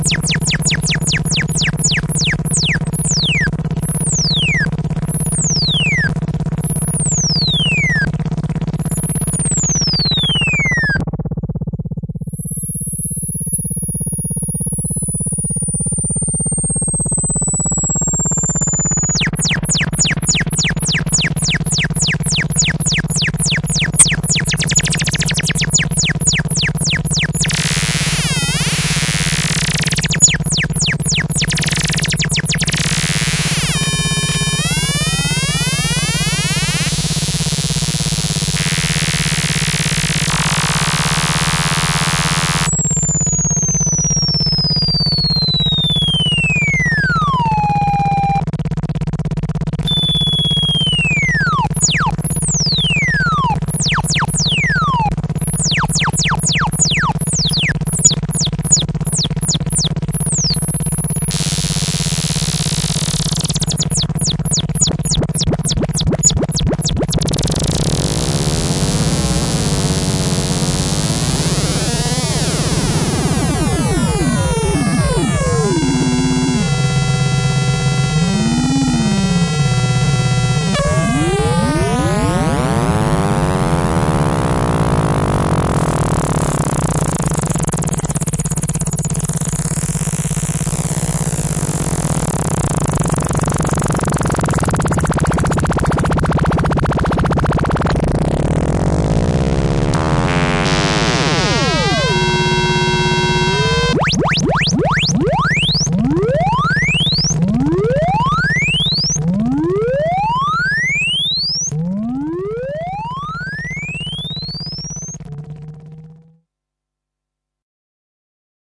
Made with modular synth